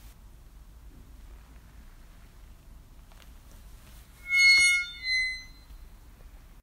Gate Creak
The creaking of a gate at nighttime. Made using a gate. And darkness.
groan, spooky, eerie, gate, graveyard, horror, gothic, night, creak, hinge, metal